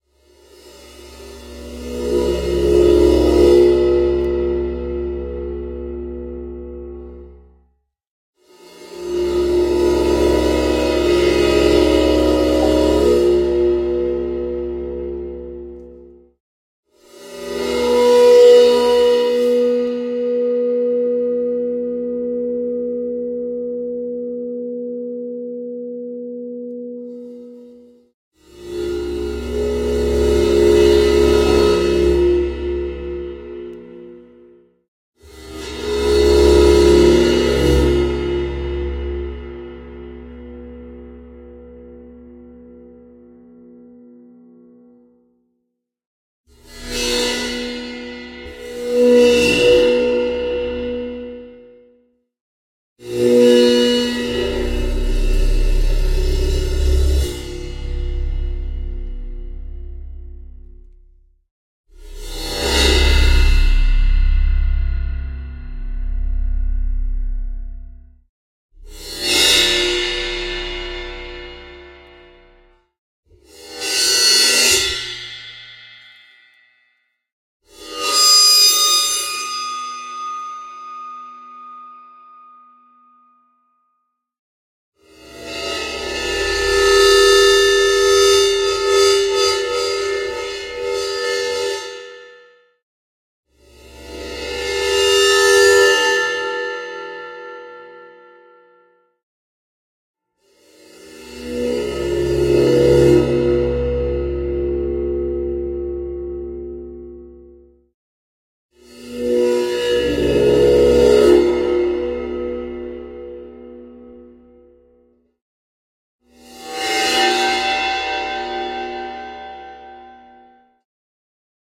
Cinematic Cymbal Screams 1
Scary cymbal-played-with-bow-sounds (Part 1)
cymbal, scream, drama, spooky, fear, horror, scary, suspense, effect, bow, movie, sfx, fx